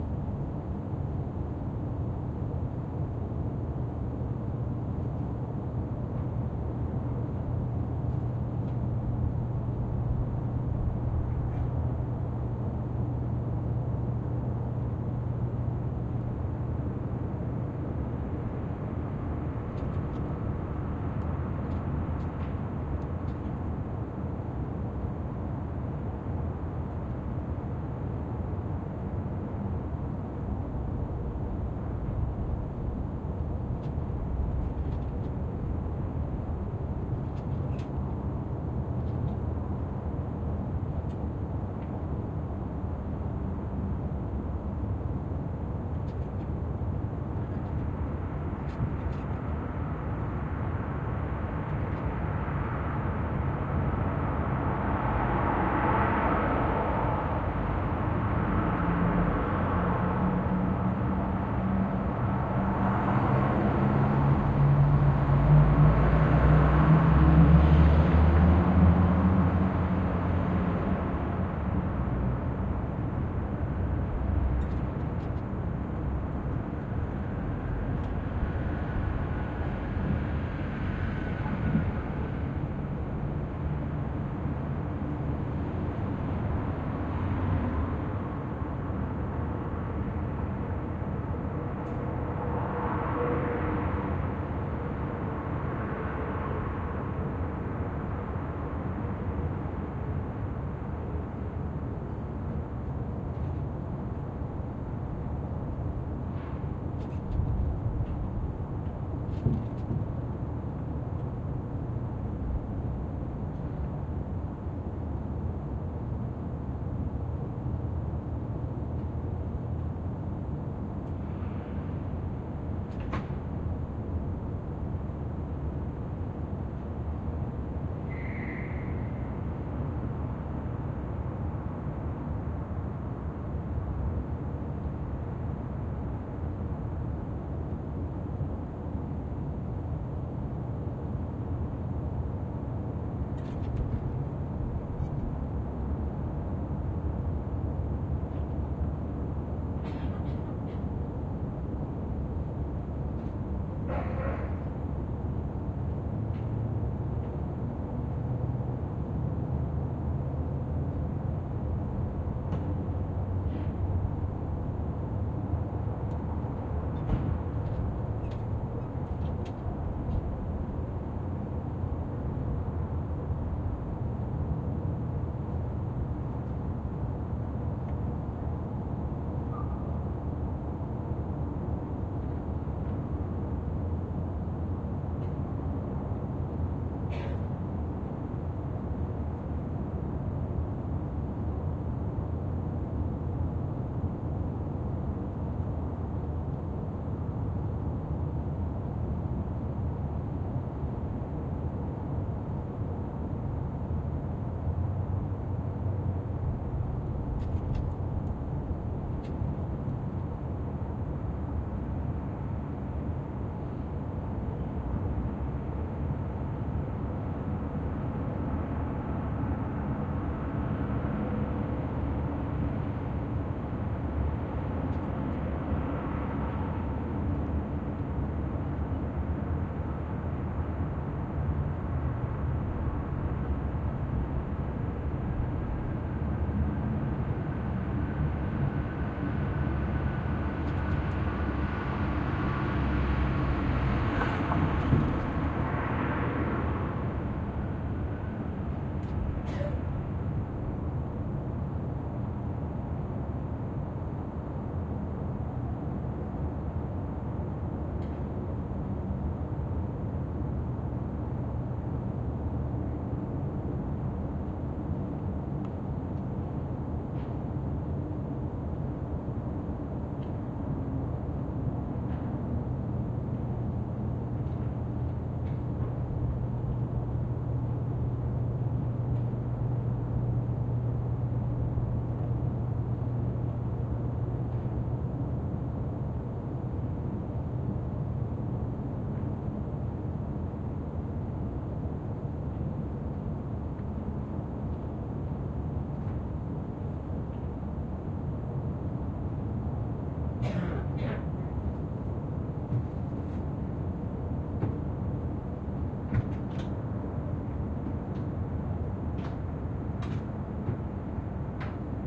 skyline city roar rumble urban from 3rd floor balcony +little rattles nearby Montreal, Canada
urban, skyline, Canada, Montreal, balcony, rumble